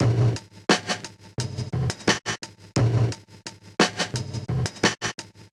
DnB Shy Beat
A beat made in Logic Pro 9 using Apple Loops and a bunch of effects and other fun stuff, Enjoy!